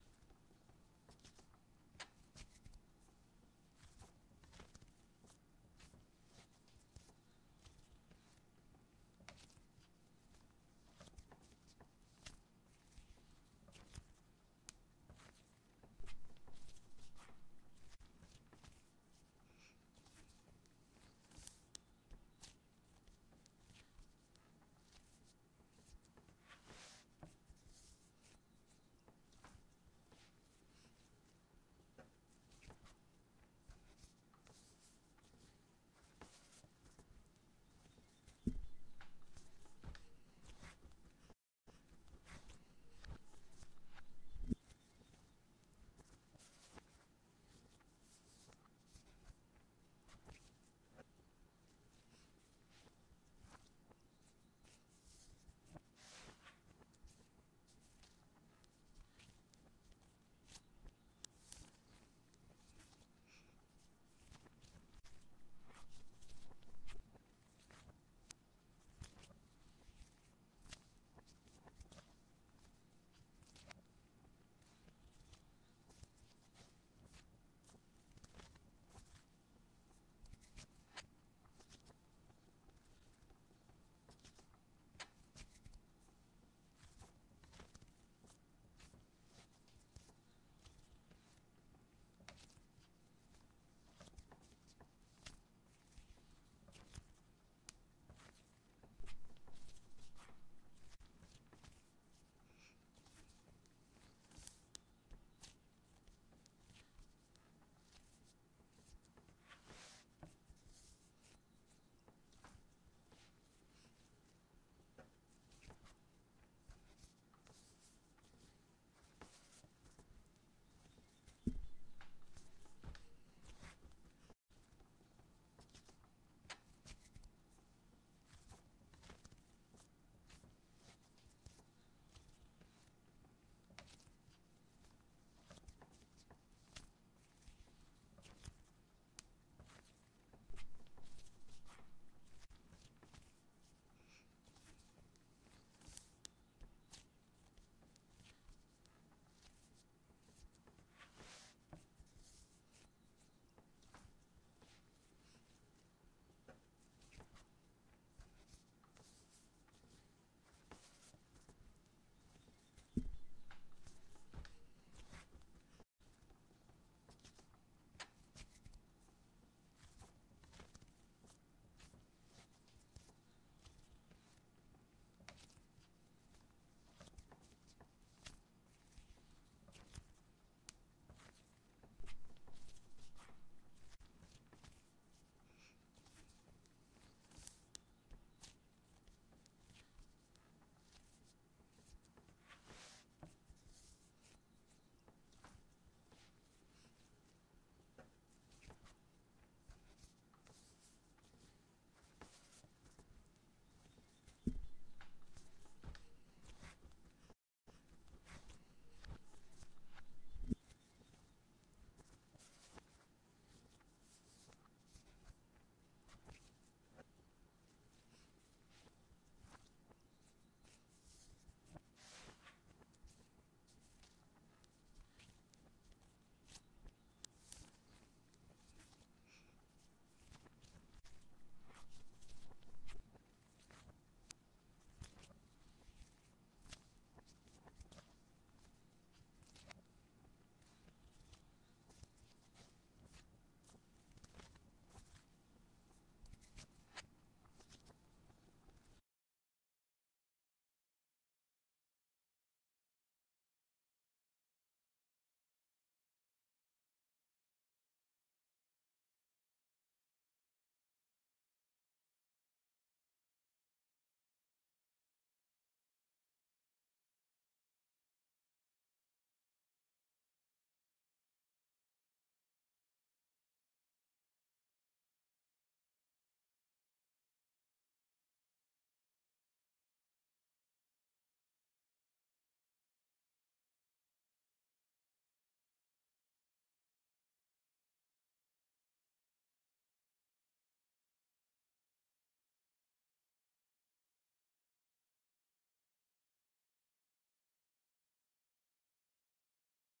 Kneading Bread sound effect. Recorded with a Shure SM47 microphone. A little bit of background bird noise, but not noticeable.
June 2015
bread, kneading, bread-kneading, Foley